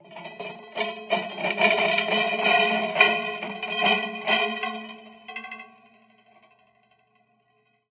drumbrush rattle 1
Sounds created with a drum-brush recorded with a contact microphone.
brush, close, contact, drum, effect, fx, metal, microphone, sfx, sound